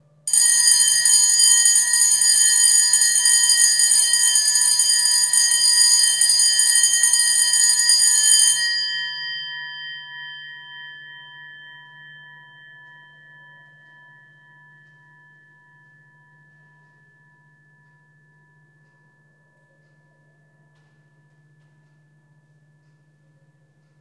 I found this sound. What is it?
School bell - Campanella scuola
Bell of "Istituto Comprensivo di Atri" School, Italy.
campanella, school, ring, bell